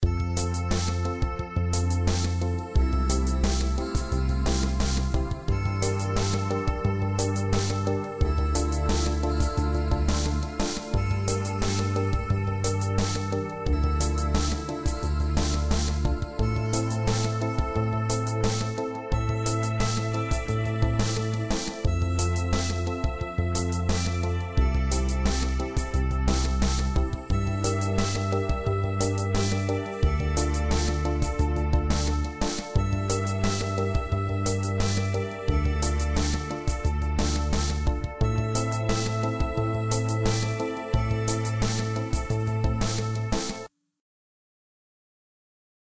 Added a few layers of subtle vocals (my voice) and did some remastering.